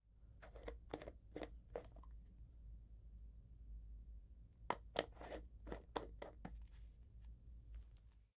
screw top platstic open and close
a small plastic hair gel tub being screwed open, then closed
closed, gel, hair, opened, plastic, screw, toiletries, top